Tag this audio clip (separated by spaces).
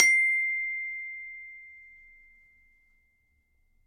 metal note